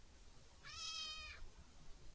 cat meow 02
Recording two of two, of a mysterious cat, following me into a forest when trying to record birds. It sounds pretty sad and lonely. It looks as if it's only a kitten.
Recorded with a TSM PR1 portable digital recorder, with external stereo microphones. Edited in Audacity 1.3.5-beta on ubuntu 8.04.2 linux.
forest, animal, meow, cat